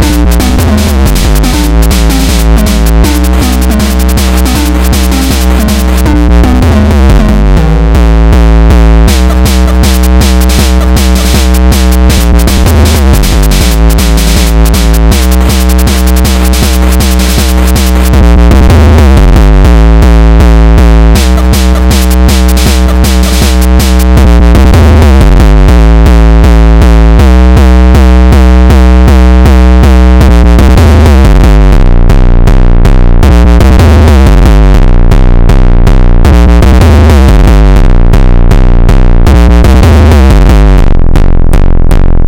8-bit Gabber Piece
Piece of an 8-bit inspired Gabber track of mine. Ableton Live, with the free VST's: Toad and Peach, and more